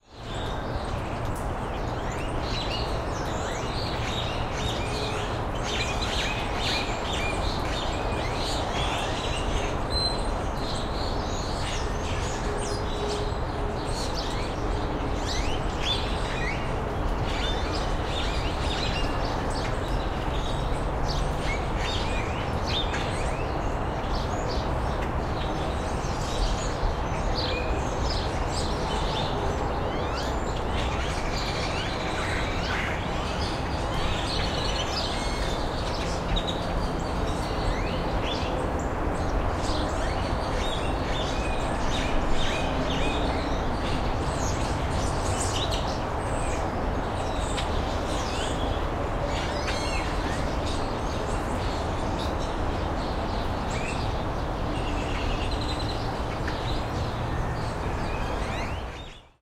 windy porch morning B
Part2 Breezy morning on my old porch. Highway noise, birds, and windchimes. Recorded with a Rode NT4 Mic into a Sound Devices Mixpre preamp into a Sony Hi-Md recorder. Transferred Digitally to Cubase For Editing.
austin, birds, breeze